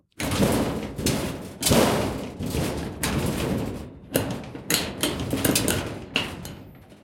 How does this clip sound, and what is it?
metal-free-long
Metal rumbles, hits, and scraping sounds. Original sound was a shed door - all pieces of this pack were extracted from sound 264889 by EpicWizard.
bell, blacksmith, clang, factory, hammer, hit, impact, industrial, industry, iron, lock, metal, metallic, nails, percussion, pipe, rod, rumble, scrape, shield, shiny, steel, ting